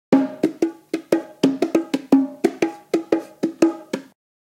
JV bongo loops for ya 1!
Recorded with various dynamic mic (mostly 421 and sm58 with no head basket)
bongo, congatronics, loops, samples, tribal, Unorthodox